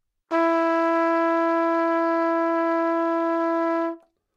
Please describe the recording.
overall quality of single note - trumpet - E4
Part of the Good-sounds dataset of monophonic instrumental sounds.
instrument::trumpet
note::E
octave::4
midi note::52
tuning reference::440
good-sounds-id::1067
dynamic_level::p
good-sounds, single-note, multisample